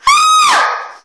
girl scream
Girl horror scream recorded in the context of the Free Sound conference at UPF